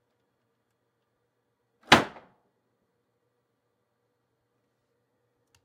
Window slamming closed.